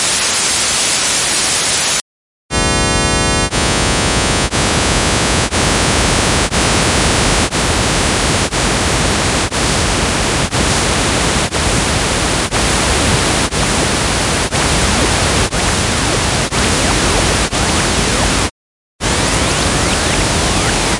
Noise created by individual oscillators, using audio paint, with different height images, to demonstrate what happens with too few oscillators vs. plenty. In the end, the result is not random enough to be noise. The first 2-second burst is pure white noise for comparison. Then we have multiple 1-second bursts from audio-paint in a sequence of different image sizes: 50,100,150,200,250,350, 500, 700, 1000, 1350, 1750, 2200, 2700, 3250, 3850, 4500, and 9999 (this corresponds to the number of oscillators). The last burst is longer, and there is 1/2 second gap of silence after the first (reference) burst and before that last (9999) burst. The images other dimension was 20. The spacing of frequencies was exponential, between 40 Hz and 18 KHz. As mentioned there, I realized only afterward that exponential spacing would be giving me an approximation to pink noise instead of white noise, so the reference burst at the start is not really a fair comparison.
audiopaint, forums, noise, synthetic